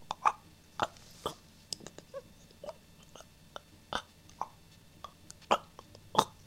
Male Choking 1
Male Choking: a young adult male coughing, choking and struggling to breathe while being choked.
This was originally recorded for use in my own project but I have no issues with sharing it.
man, breathing, vocal, human, coughing, choking, voice, reaction, male, breathe, cough